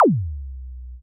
Laser Kick
Laser like kick sound from analog modular synth
drum, kick